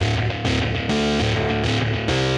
101 Dry glide gut 04
crunchy guitar riff
free, guitar, heavy, riff